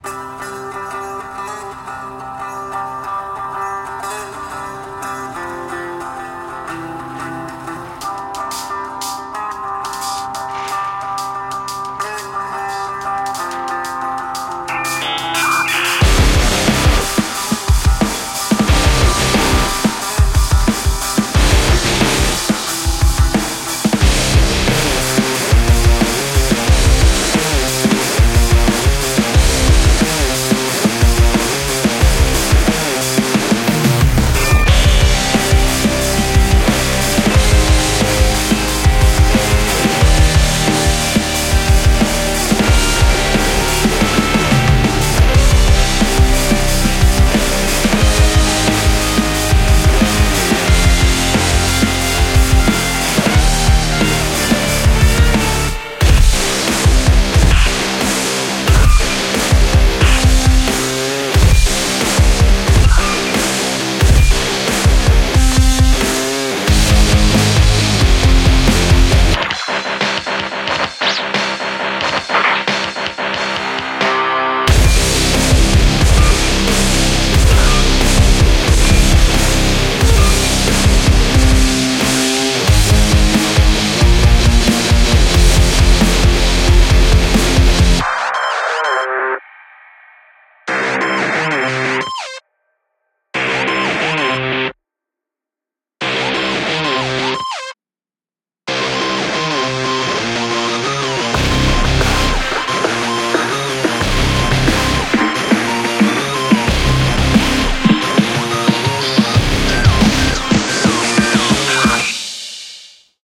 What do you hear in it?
cyberpunk metal
Heavy metal inspired music part of a series of concept track series called "bad sector"
synth, dialup, game, sci-fi, modem, intense, metal, futuristic, sitar, heavy, cyberpunk, electronic, digital, noise, music